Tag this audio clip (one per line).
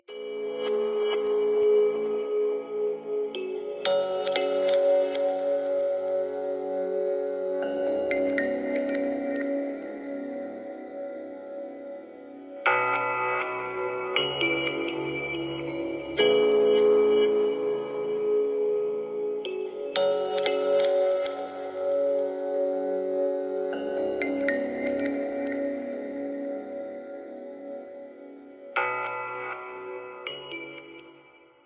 pad
backgroung
score